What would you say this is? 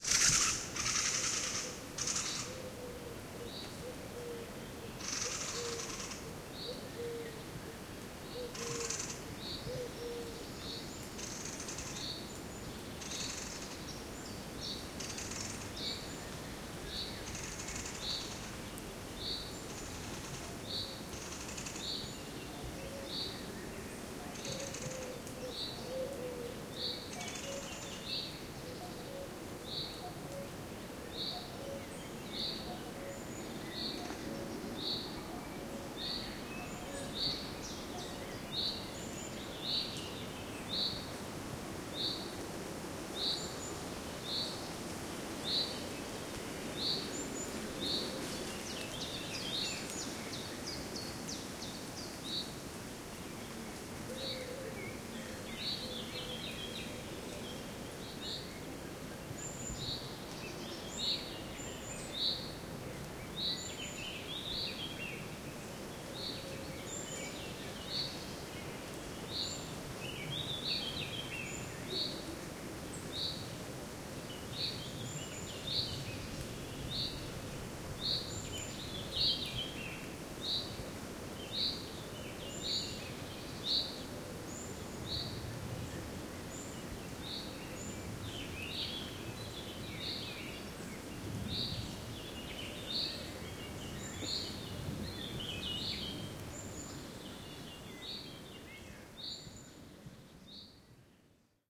Ambiance in a forest in France, Gers.wind in the trees, many birds, a jay fly by at the beginning,distant cuckoo. Recorded A/B with 2 cardioid microphones schoeps cmc6 through SQN4S mixer on a Fostex PD4.